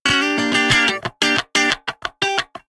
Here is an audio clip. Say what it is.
Funky Electric Guitar Sample 10 - 90 BPM
Recorded with Gibson Les Paul using P90 pickups into Ableton with minor processing.